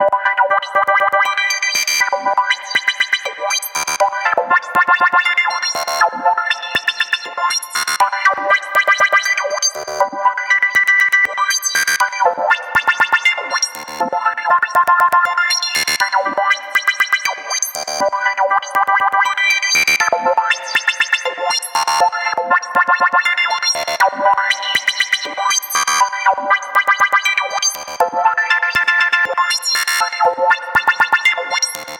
MA SFX RoboticMelody 2
Sound from pack: "Mobile Arcade"
100% FREE!
200 HQ SFX, and loops.
Best used for match3, platformer, runners.